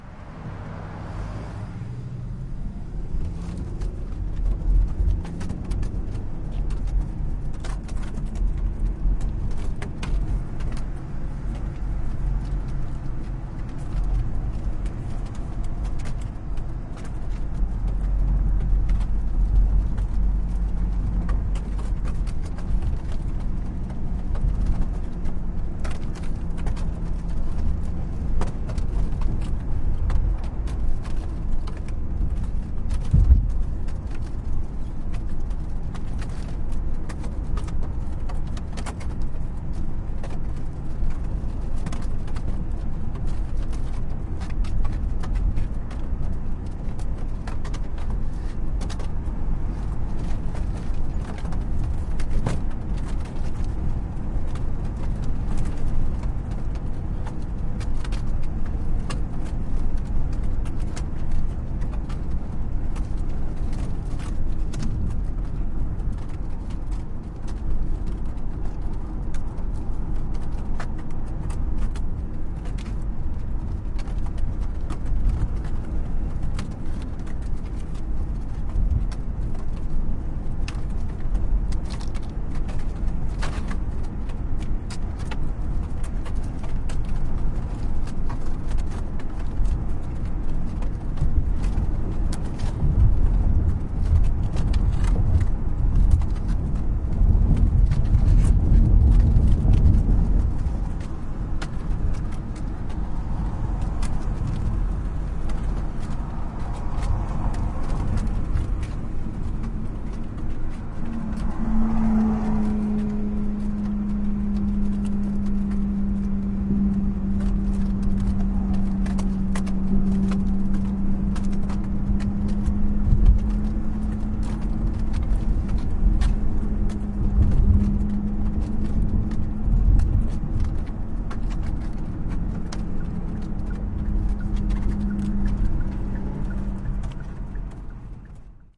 car, interior, balloons from Steve's birthday
Hollywood